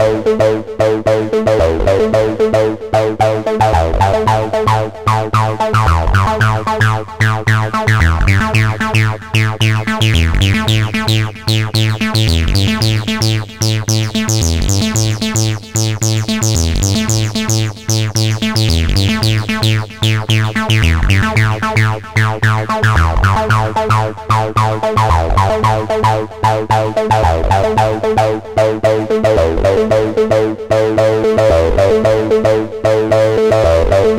This is a looper I made in Cubase with a VST instrument called Rubber Duck. Added a reverb and delay. It runs over several breaks at 112bpm. Hope you enjoy :)